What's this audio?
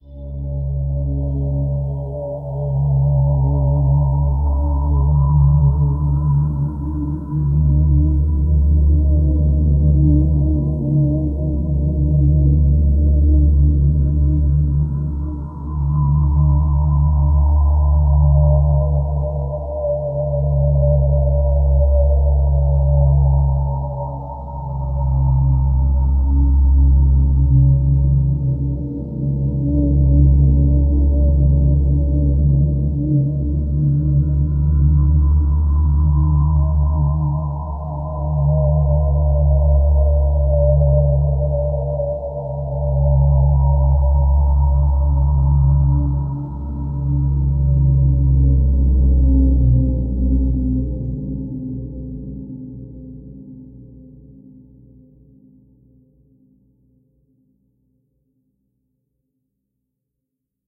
Horror ambiance created using Kontakt Player
Ambiance, Atmosphere, Drone, Synth, Horror, Ambient